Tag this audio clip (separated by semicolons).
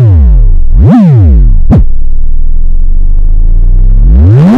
electronic; noise; processed